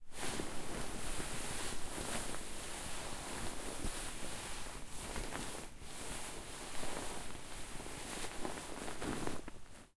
Blanket Movement 1
A down doona/duvet being rustled. Stereo Zoom h4n recording.
cloth, clothes, blanket, textile, clothing, swish, duvet, material, moving, down, stereo, fabric, sheets, rustling, zoom-h4n, doona, sheet, pillow, shirt, rustle, zoom, h4n, foley, movement